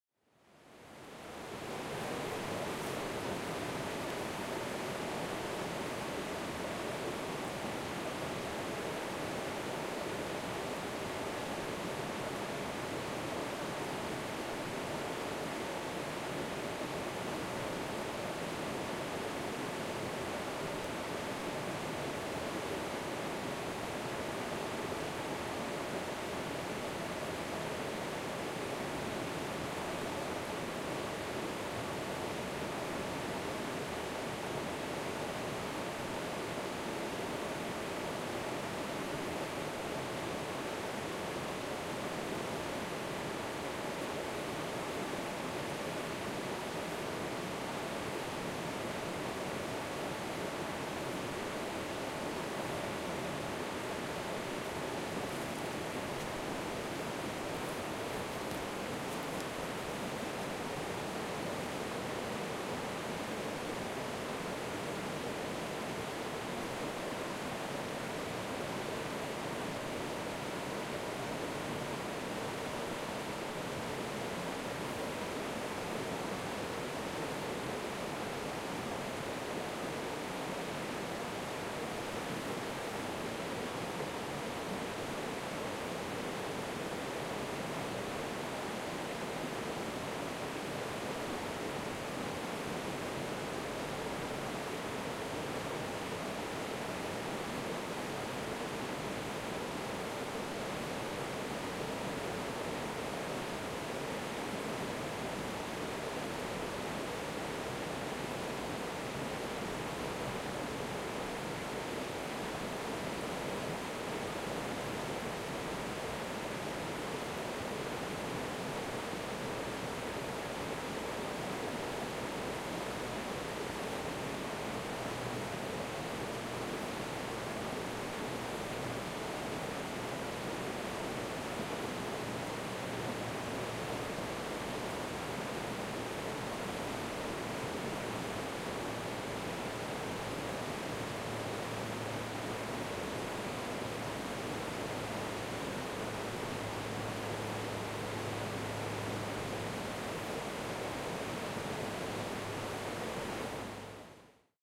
River Drone
Recorded along the Puntledge River in Bear James Park. Recorded with a H4N Zoom.
Ambient, Drone, h4n, River, Stream, Water